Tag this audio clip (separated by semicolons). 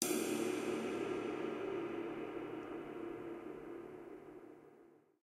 TRX; custom; one-shot; Bosphorus; click; drum; wenge; wood; crash; Istambul; one; cymbal; drumset; shot; Young; bronze; snare; bubinga; cymbals; ride; Cooper; turks; metronome; hi-hat; hit